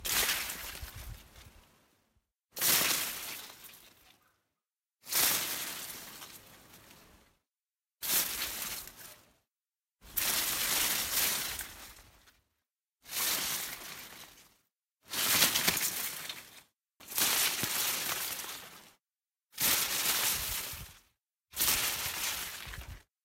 S Amb HittingBushes Mono 02
Hitting some bushes.
Bush, Bushes, Field-Recording, Hit, Leaves, Park, Trees